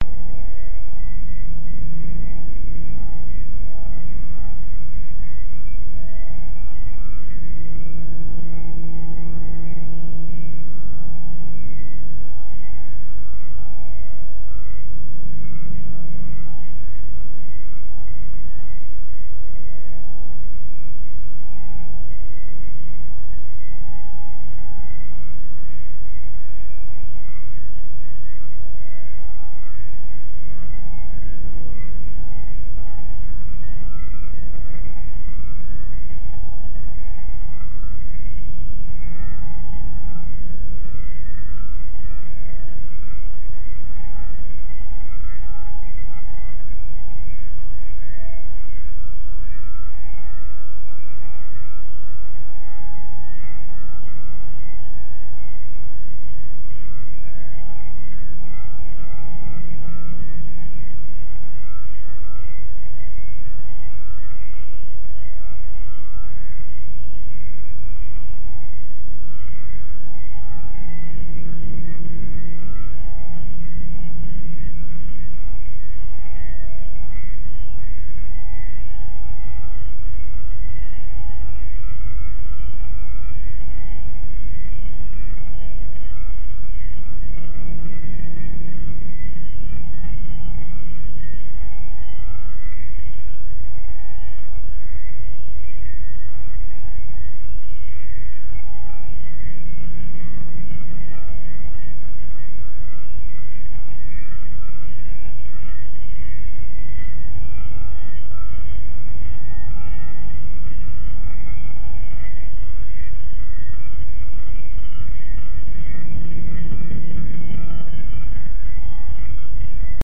Akin to flying through a field of electrically active information.
I am a software engineer specializing in low-code development, with a strong focus on building secure, scalable applications using Quickbase and cloud-integrated automation platforms.